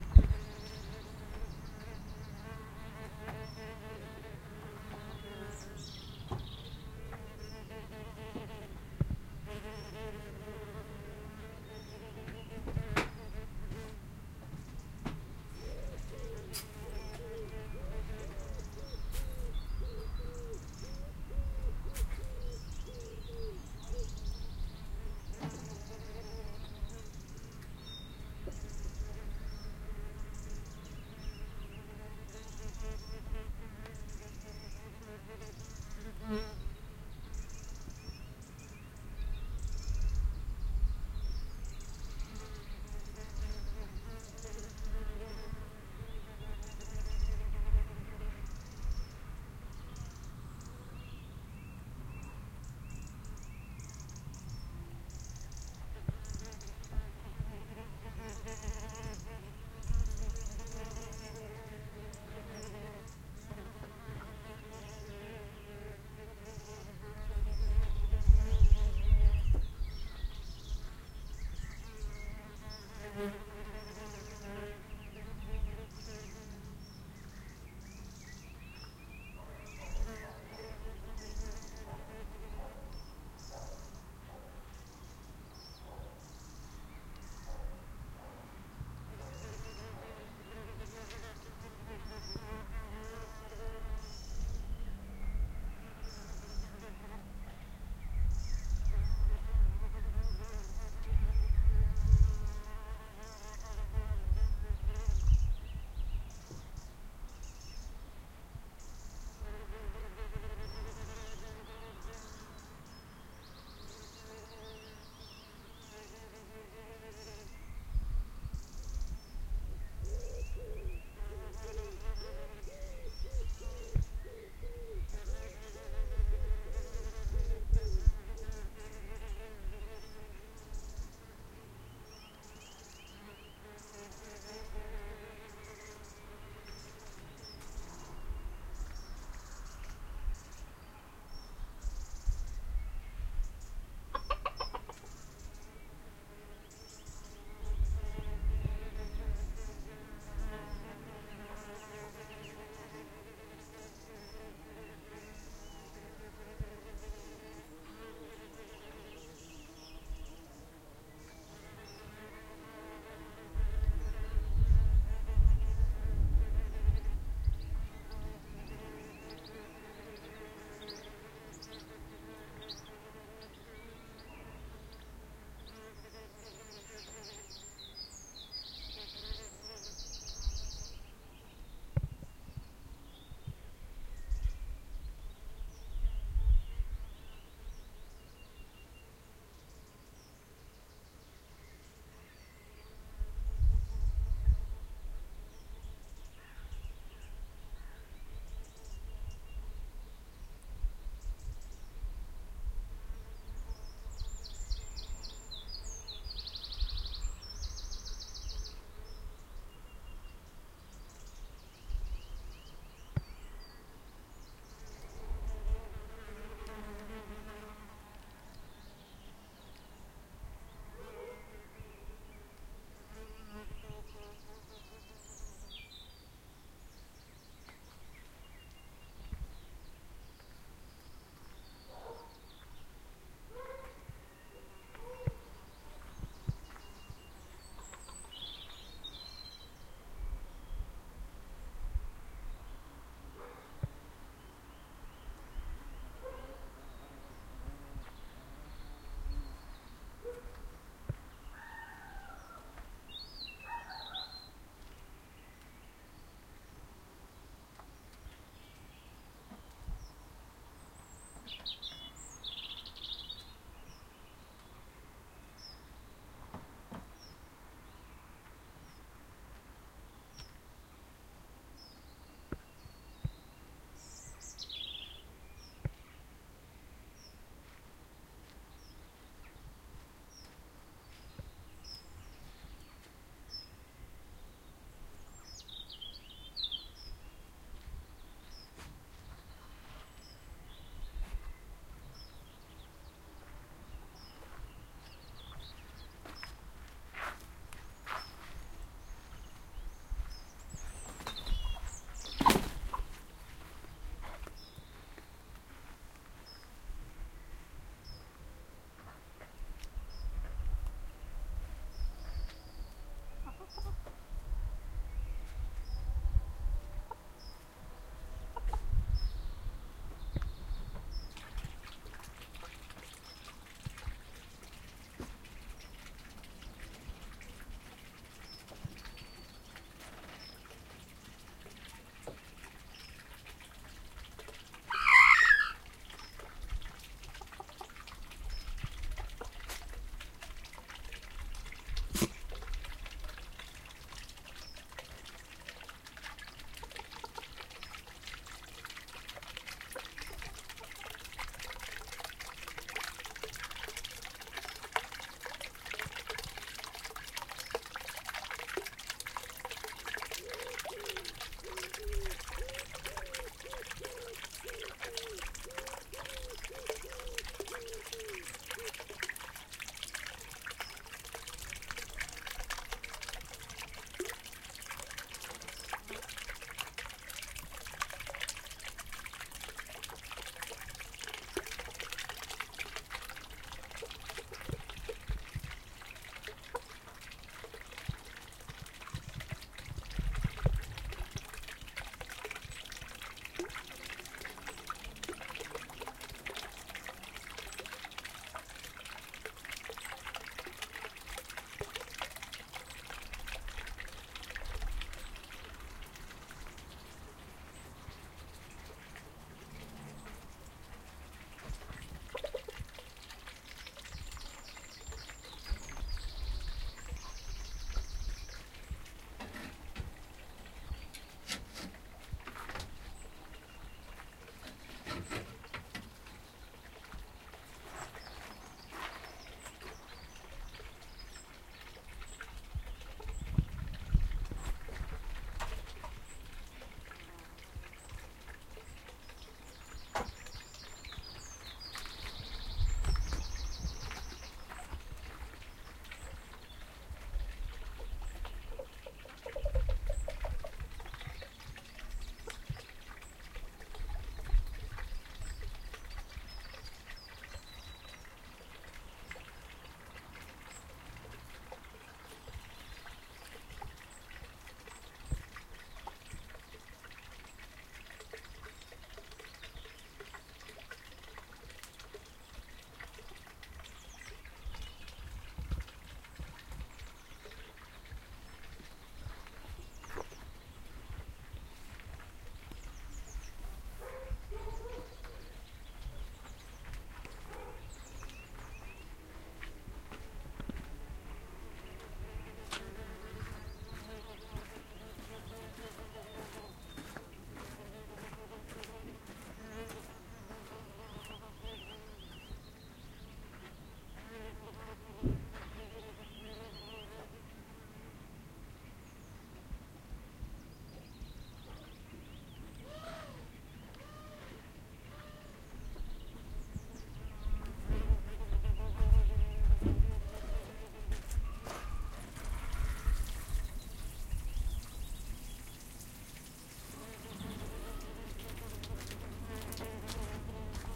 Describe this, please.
spring bees chickens dogs walking breeze water
This was recorded in the Spring of 2018 in a back garden (yard) on a Zoom H1.
Please tag your link if you use this sound.